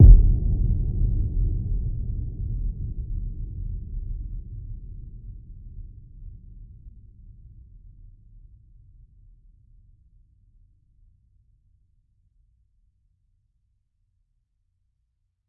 Big ass boom sound I made with my own two feet...